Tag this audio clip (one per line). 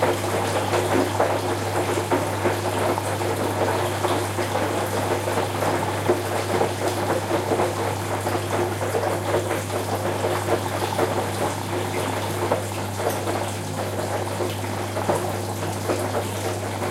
water
wash
washing
machine
rinse
washing-machine
cycle
soap